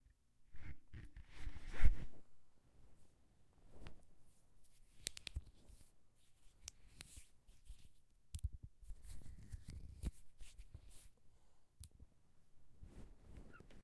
bone crack 04
abstraction, syracuse